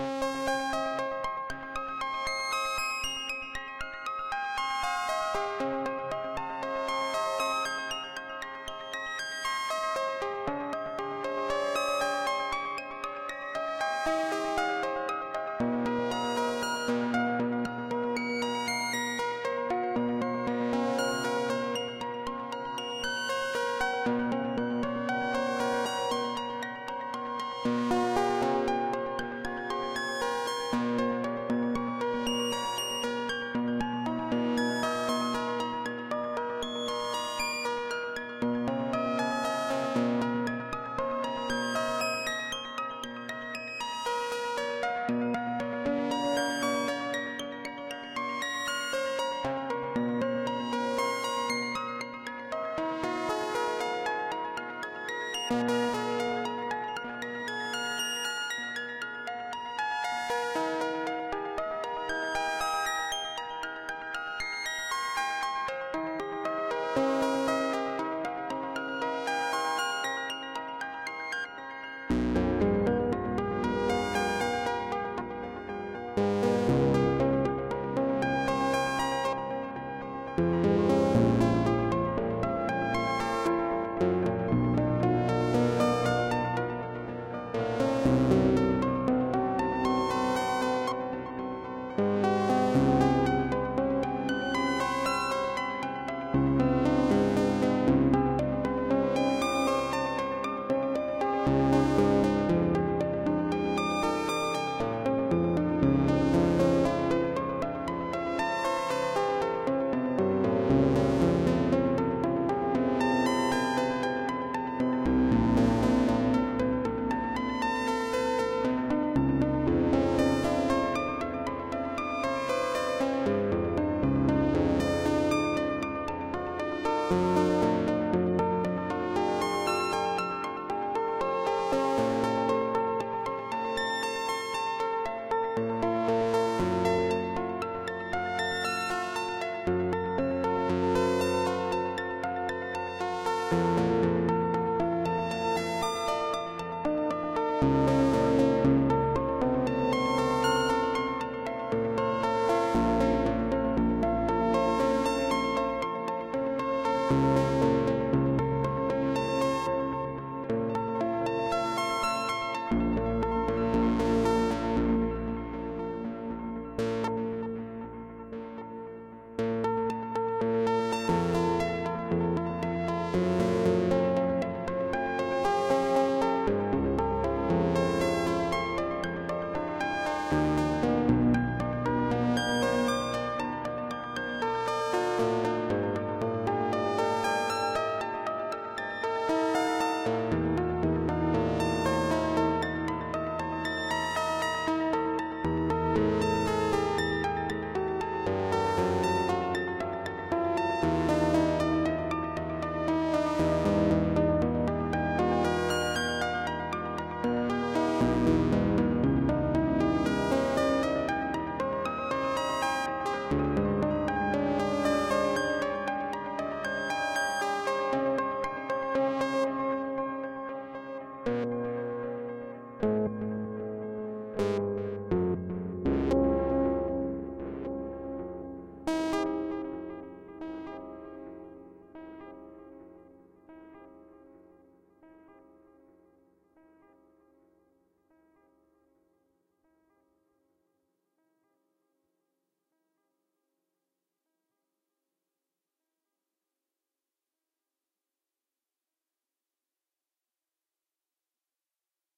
Drowning in Thin (Drone and Delay Synth)
I programed a patch on the Arturia Jupiter 8 Demo and made a improvisation out of it.
Transportation, Drive, Recording, Public, Free, Film, Rattle, Travel, Cinematic, Ambient, Ride, Trains, Pass, Movie, Drone, Road, Passing, Atmosphere, Highway